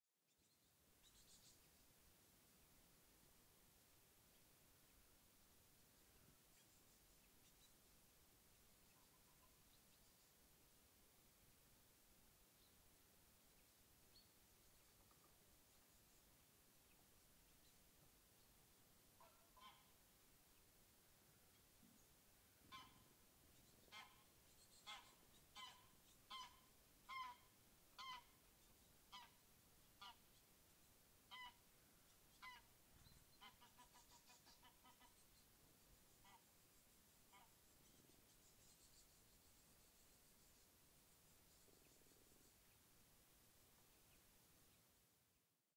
sweden-forrest-goose-screaming
Recorded some forest winds and birds when this goose flew over. Its in the middle of the recording
birds, flying, geese, goose, mono, nature, Sweden